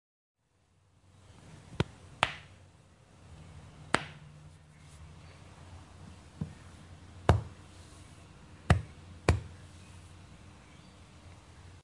The sound made when slapping a furry animal on the rump (note: no animals were harmed during the recording of these sounds) :-)
slap, slapping